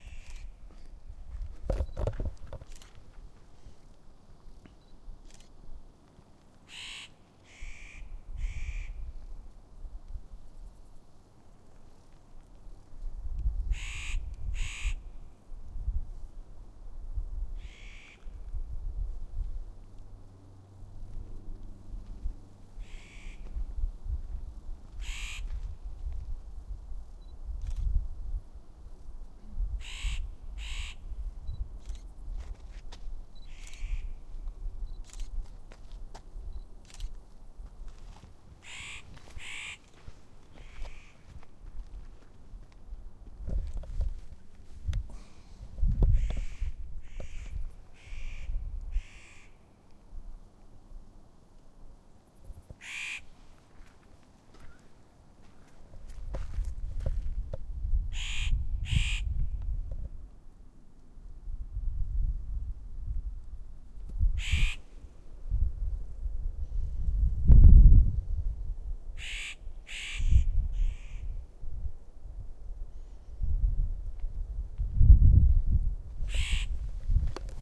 Recording on the side of a mountain in the Alps
alps, nature, field-recording